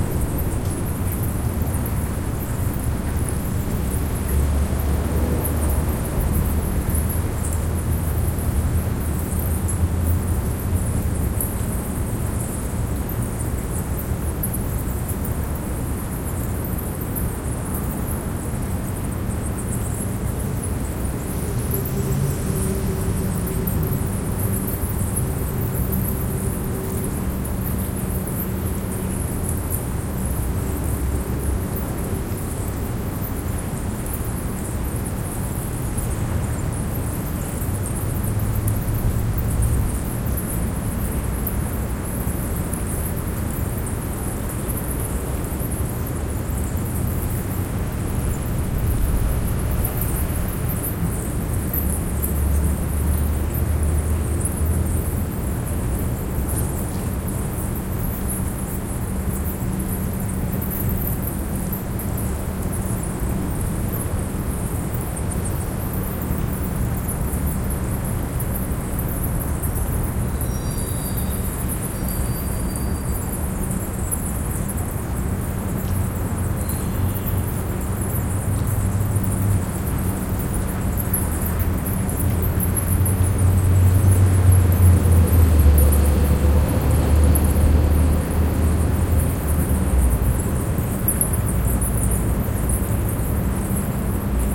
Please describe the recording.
Bats ambience 3
Bats with city ambience, distant truck pass.
From a recording made underneath the 'Congress Bridge' in Austin Texas which is home to a large bat colony.
ambience, bats, field-recording